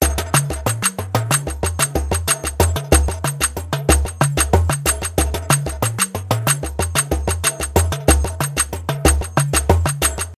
93 BPM
Cool little tribal/world music type sounding clip with a bunch of percussion instruments